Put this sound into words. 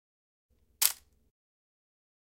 Contarex camera shutter sound